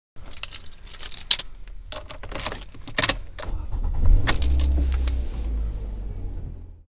keys in ignition and start